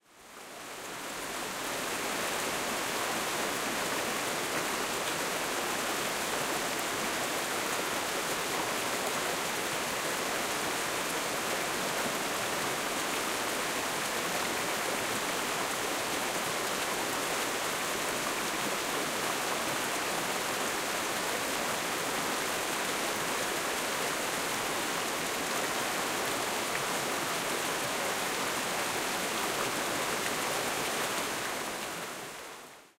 Distant field recording of water flowing through some rapids in a creek.
Recorded at Springbrook National Park, Queensland using the Zoom H6 Mid-side module.